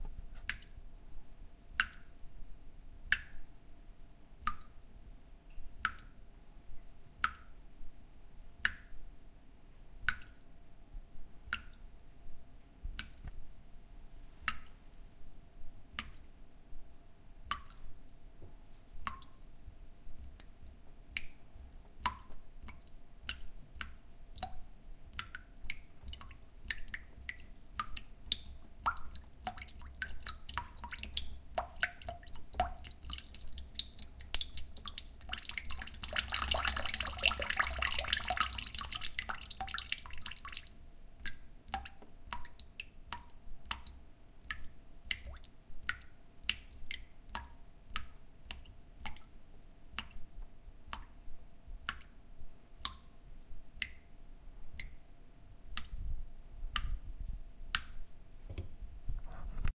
erst tropfts dann läufts dann tropfts
waterdrops falling into a glass, first slowly, then quickly, then slowly again
dropping, water